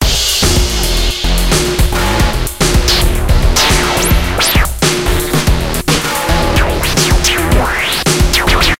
This is a portion of my music's rhythm section. Thanks.
loop, synthesizer, techno, sample, electronica